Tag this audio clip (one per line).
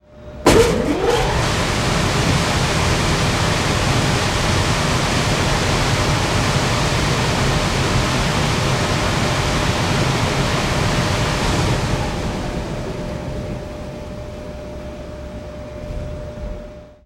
power
fan
large-blower
electric-motor